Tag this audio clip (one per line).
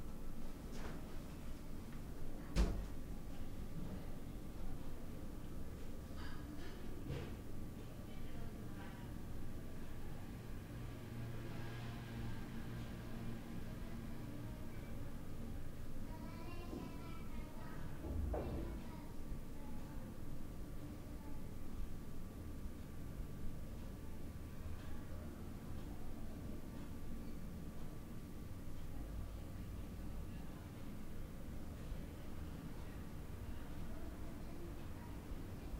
house
room
silent
tone